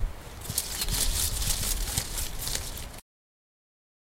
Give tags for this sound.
chirping
game
rustling
bird
nature
environment
trees

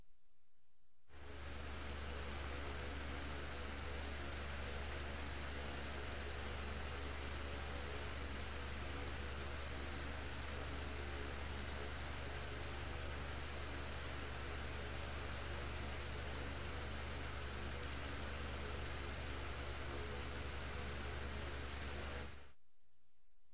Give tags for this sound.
lowder
fan
high
desk

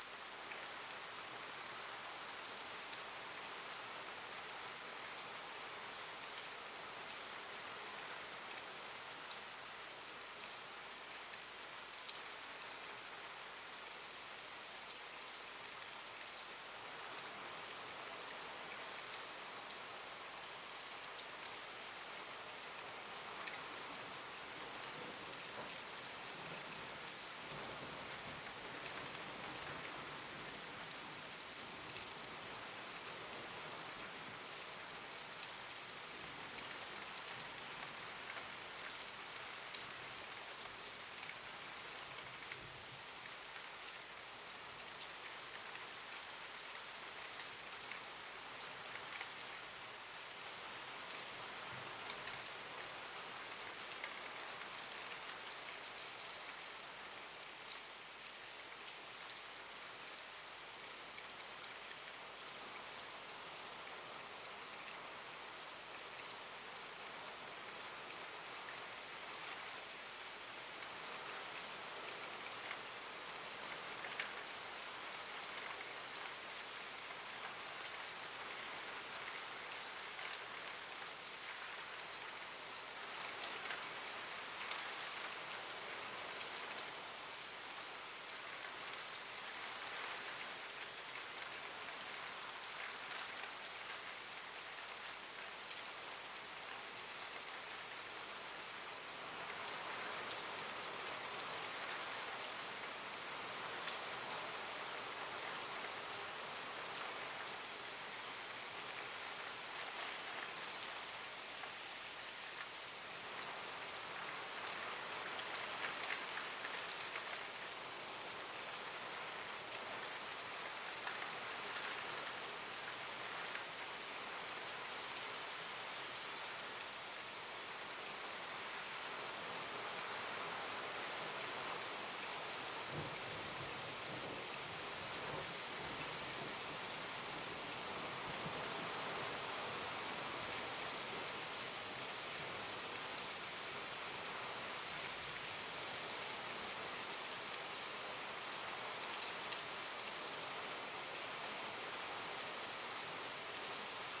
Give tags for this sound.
indoors
rain
window
weather
thunder

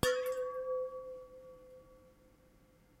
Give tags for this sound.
bottle
hit
ring
steel
ting

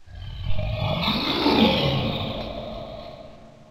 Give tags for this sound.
frightening
Horror
loud
scary
unsettling

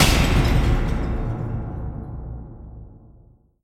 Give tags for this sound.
50-users-50-days
bang
boom
break
crash
destruction
explode
explosion
explosive
glass
glass-break
glass-smash
qubodup
smash

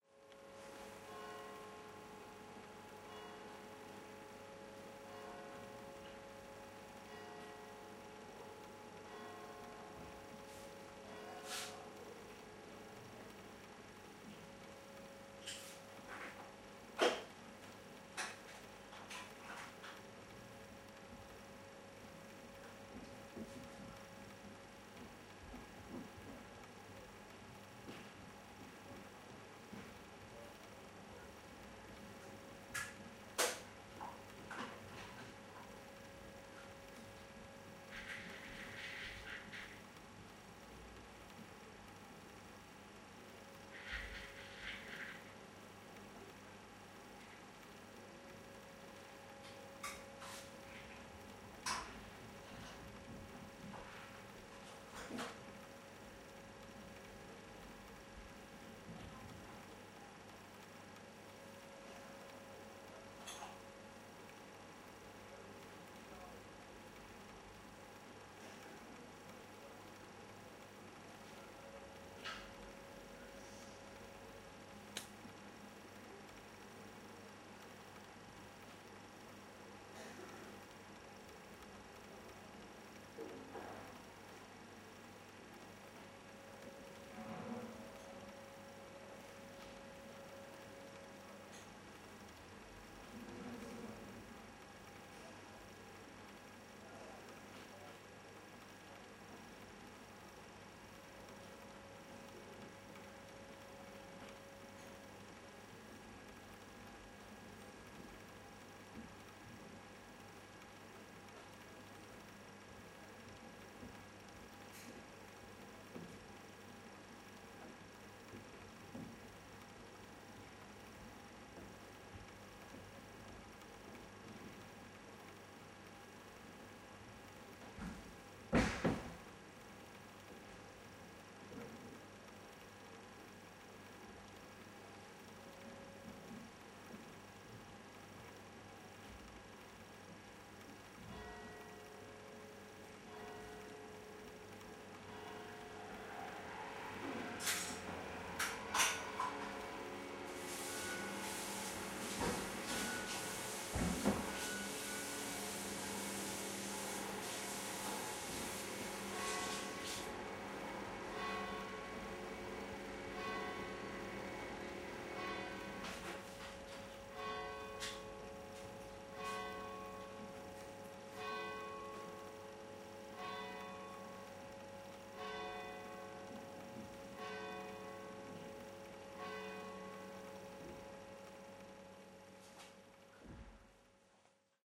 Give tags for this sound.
inside
hostel
barcelona
barna
fernando

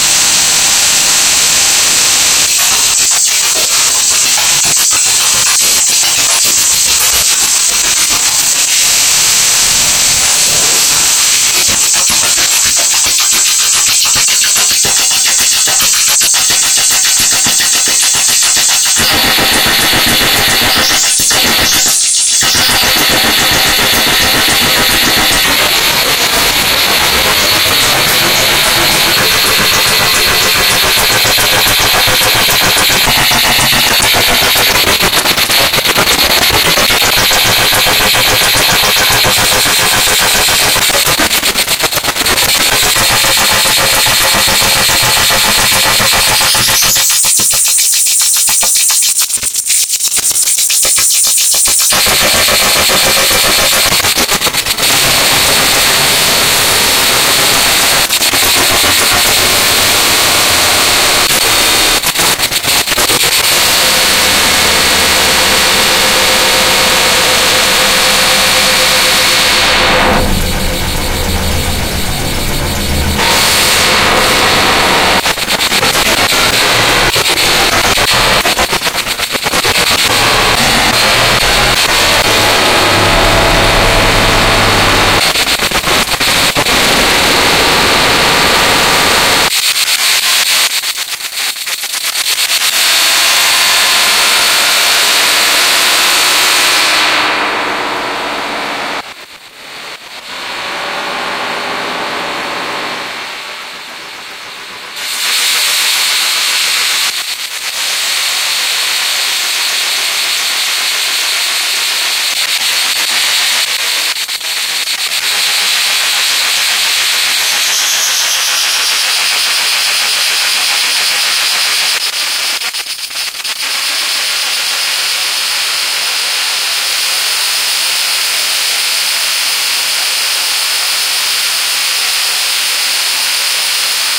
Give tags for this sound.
annoying
digital
harsh
live
modular
noise
synth

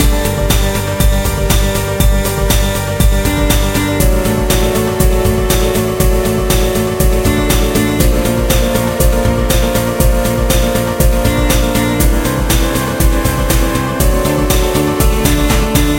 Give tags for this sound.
game
gamedev
gamedeveloping
games
gaming
indiedev
indiegamedev
loop
music
music-loop
Philosophical
Puzzle
sfx
Thoughtful
video-game
videogame
videogames

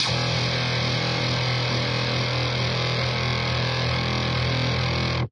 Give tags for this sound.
atomic
electro
free
grungy
guitar
hiphop
loop
series
sound

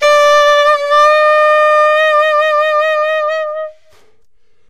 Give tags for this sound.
sax
saxophone
alto-sax

